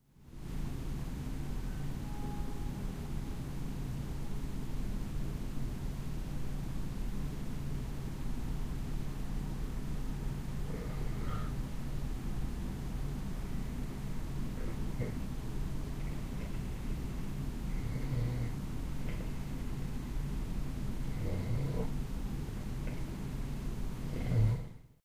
About one kilometre from my house a train is passing blowing it's horn. It's far past midnight and I am asleep but switched on my Edirol-R09 when I went to bed.